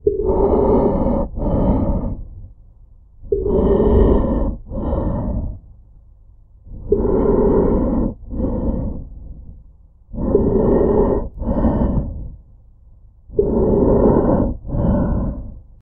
Mask Breathing Sound
This is a breathing sound that supposed to replicate what it sounds like in a heavy mask, like a gas mask, space suit, or whatever you want
Recorded with Sony HDR PJ260V then edited with Audacity
air, breath, breathe, breathing, exhale, gas, hazmat, human, inhale, mask, sound, space, suit